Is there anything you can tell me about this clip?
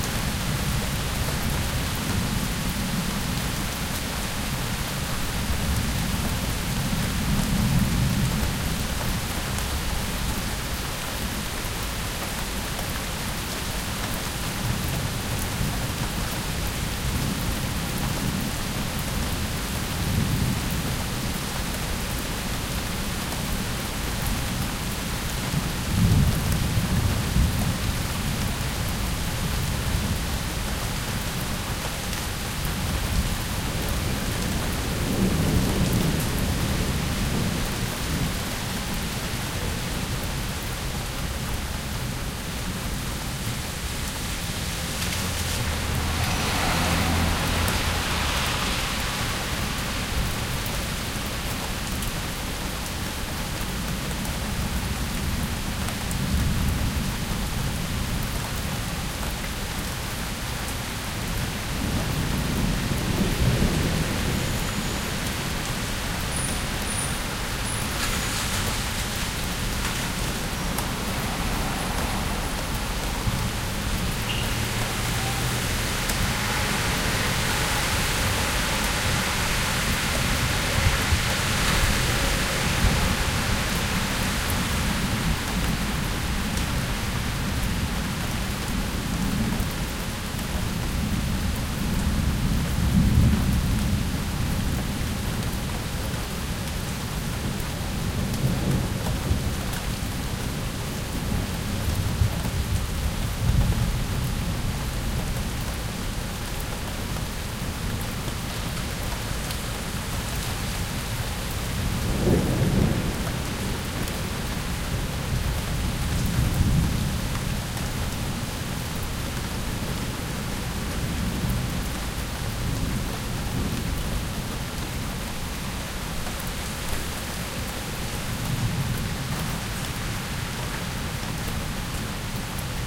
NYC Rain 2- close perspective, thunder distant, traffic
NYC Rain Storm; Traffic noise in background. Rain on street, plants, exterior home.Close Perspective
NYC, Rain, Storm, Thunder, Weather